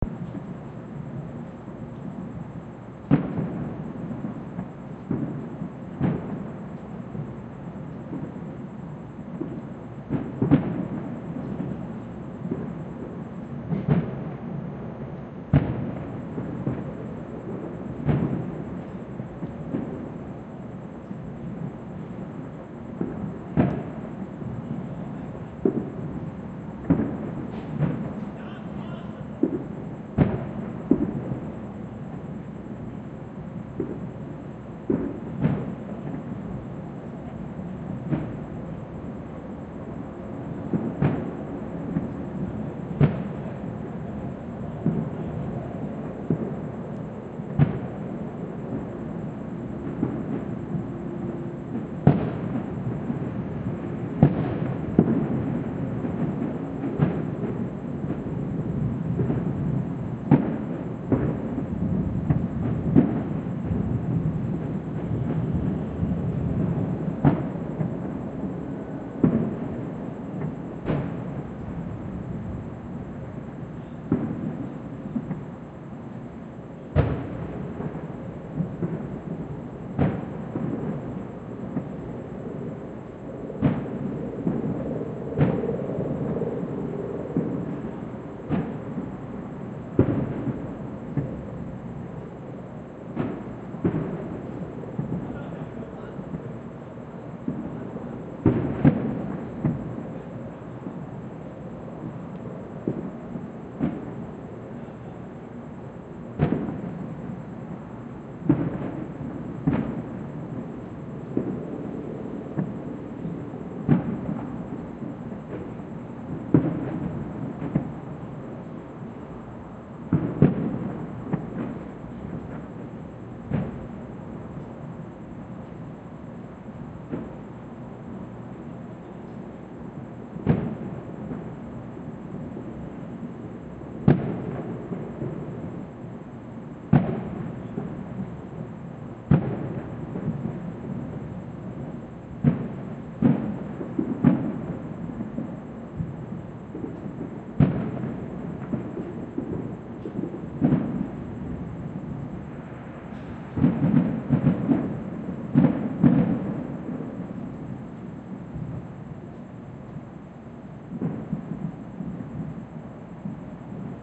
MISC S Fireworks 001
This is a stereo recording of distant fireworks on the 4th of July in Los Angeles. Some partiers' voices, and the occasional helicopter mar the otherwise nice recording.
Recorded with: Audio Technica BP 4025, Sound Devices 702t
battle, boom, cheers, crowds, explosions, fireworks, holiday, impact, july, new-year, war